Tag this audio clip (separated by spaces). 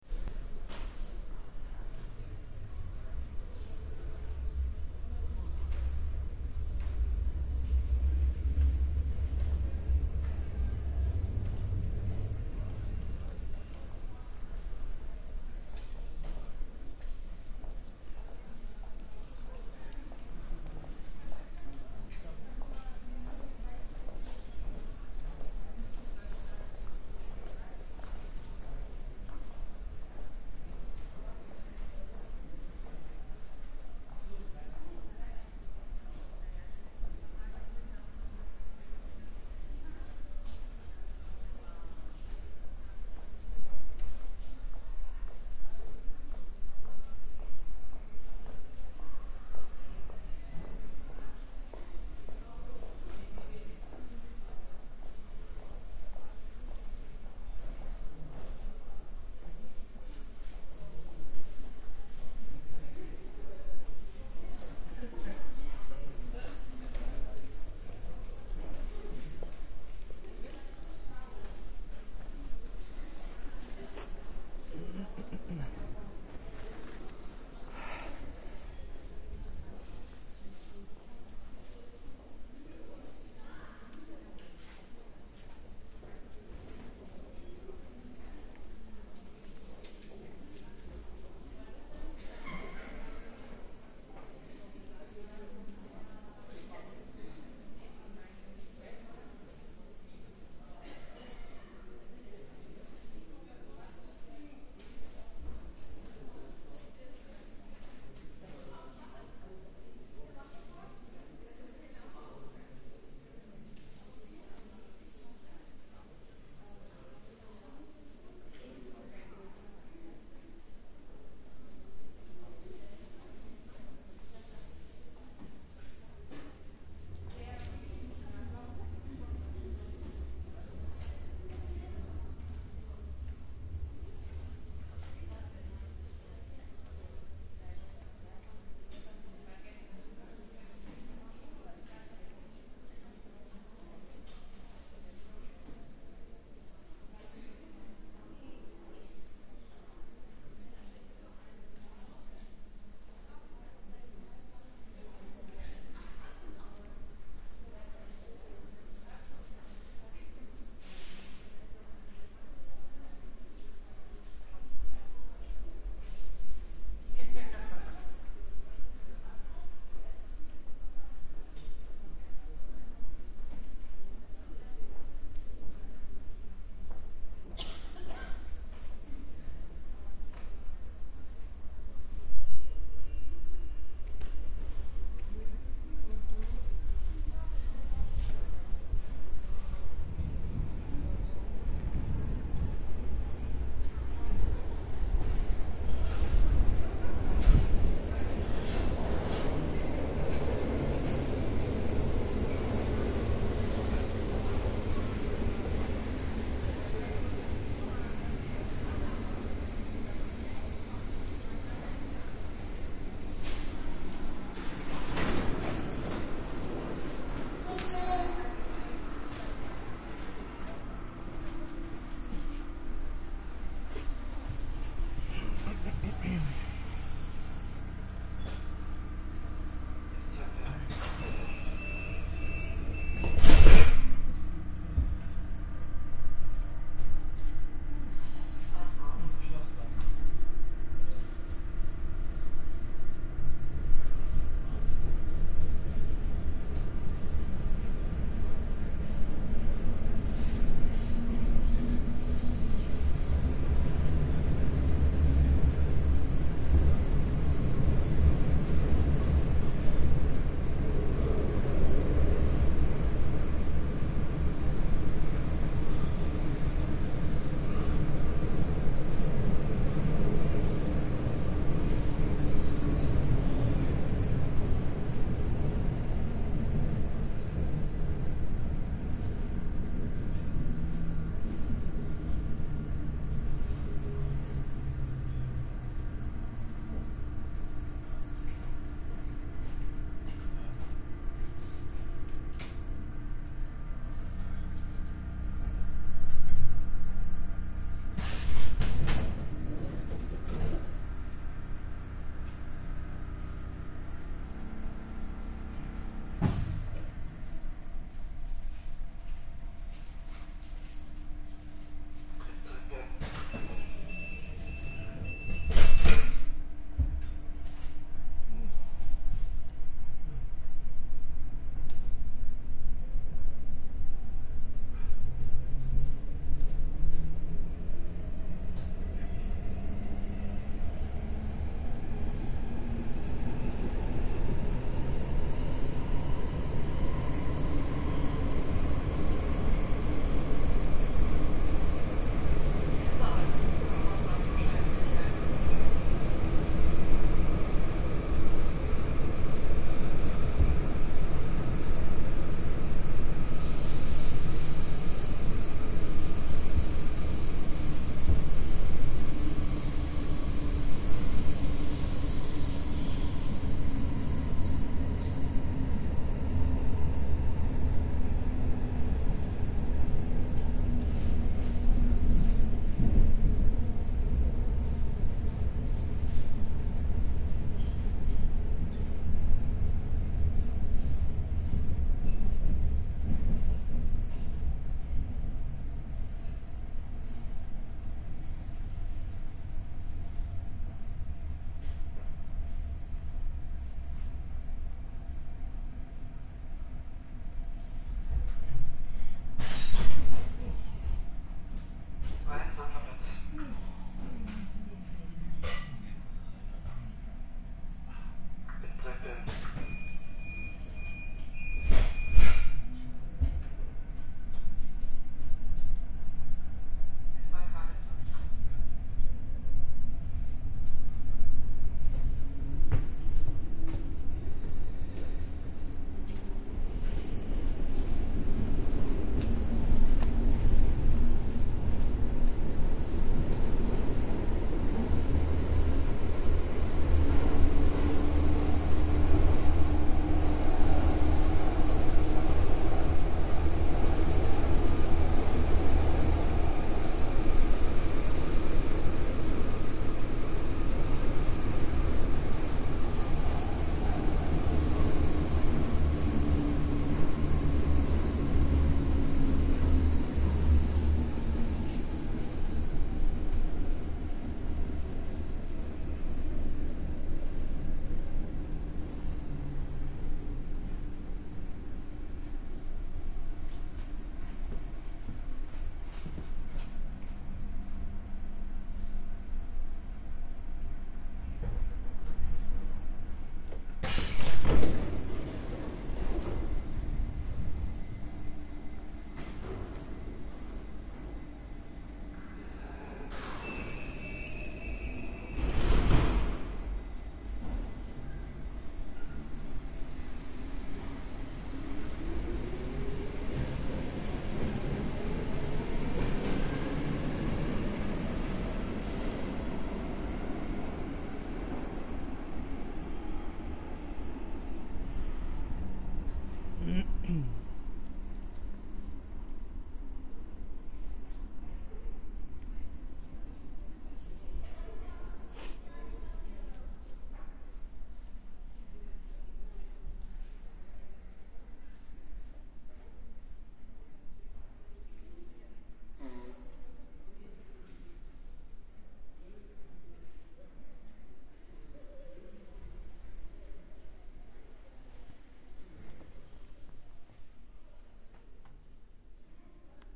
field-recording metro Munich station subway train underground